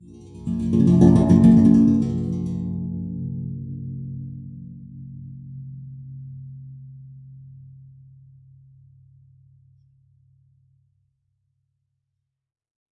metallic effects using a bench vise fixed sawblade and some tools to hit, bend, manipulate.